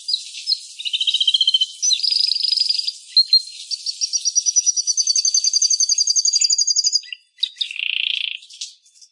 Canary singing a bit with running water in the background.